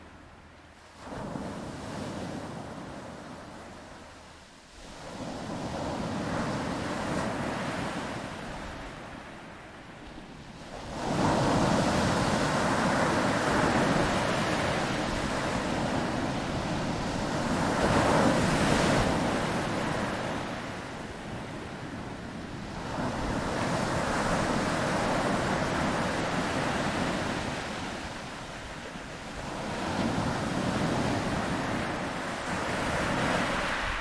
Waves on a sandy shore, a relaxing sound on a calm day with a steady quiet breeze, and the tide coming in.
This is at Slapton Sands, a long sandy beach on the south devon coast (UK), which was used in training for the D-Day landings on the Normandy beaches.
Recorded with Zoom H1.